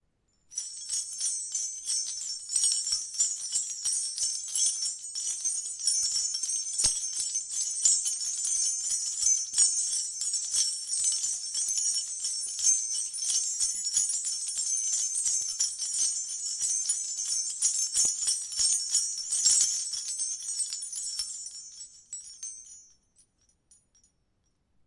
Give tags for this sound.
chime; loud; bronze; bell; jingle; ring

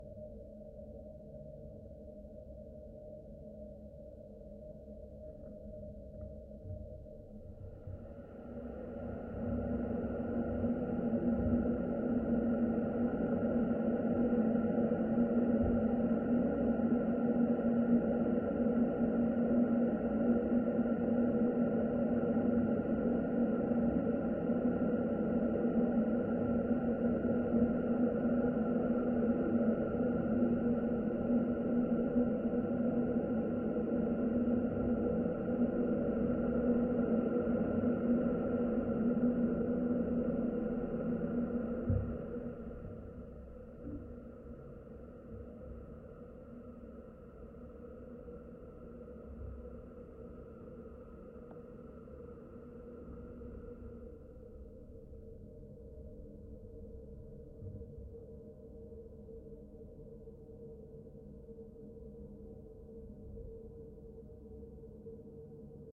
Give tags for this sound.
contact; geofon; resonance

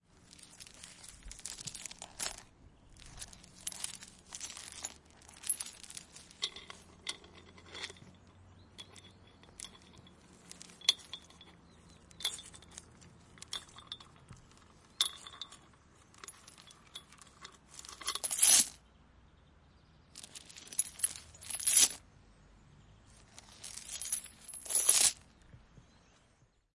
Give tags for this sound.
iron; metal

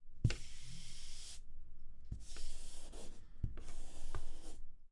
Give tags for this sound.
foot; wood

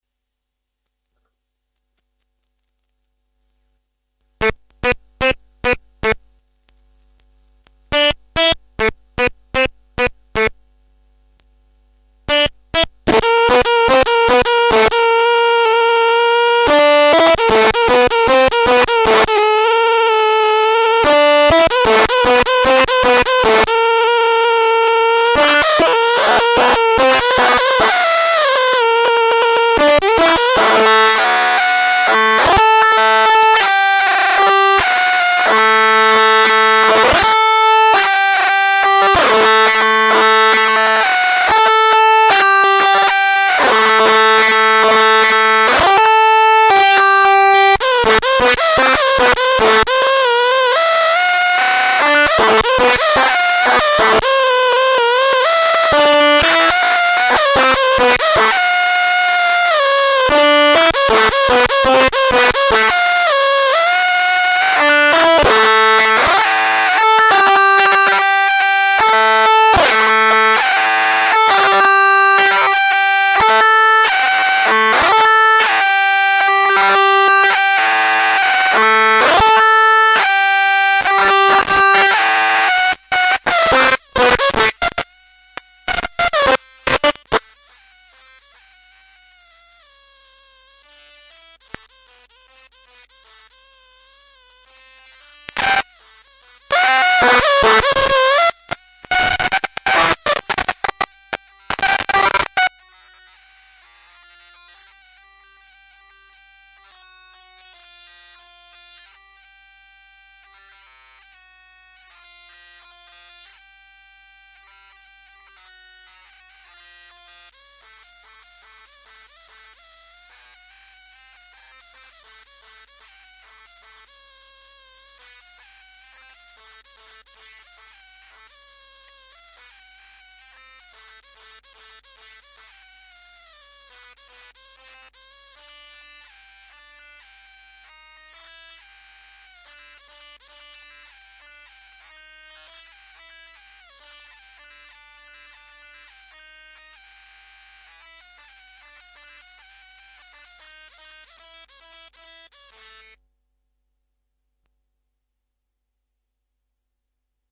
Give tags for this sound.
110bpm; a-minor; bent; circuit; distortion; dry; lead; loop; nasty; phrase; riff; stylophone

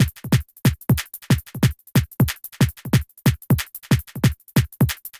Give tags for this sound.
break; breakbeat; dnb; drum; drum-and-bass; drum-loop; drums; jungle; loop

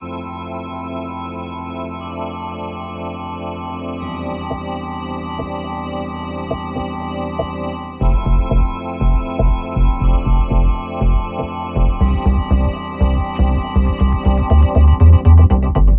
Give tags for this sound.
beat; dance; deep; electro; happy; house; intro

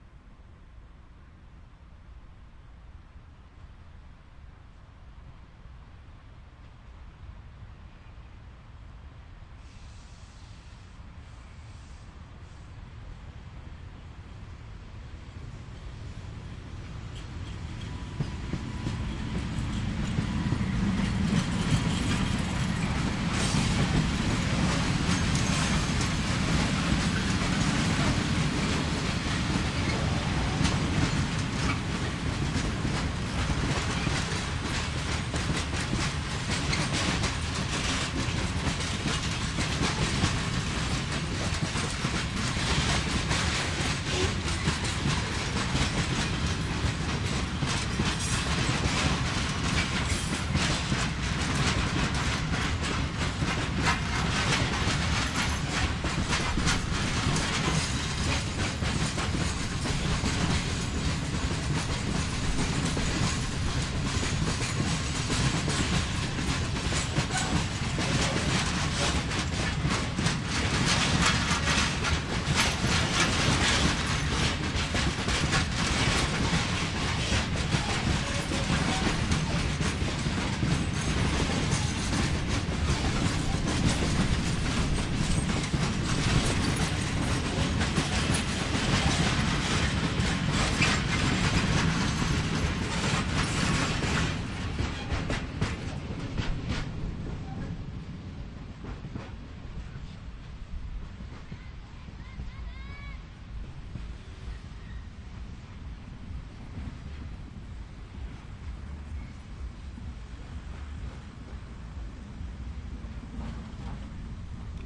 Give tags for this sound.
ambience; railwaystation; train; transport

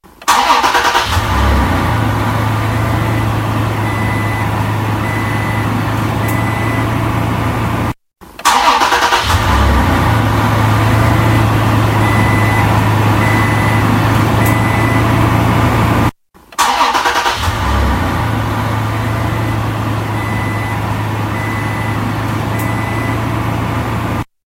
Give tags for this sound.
car; engine; ignition; starting; truck

